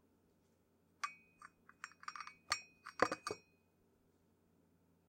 Tea cup set down
China teacup being set down on a ceramic saucer.
china, cup, cup-clink, porcelain, tea-cup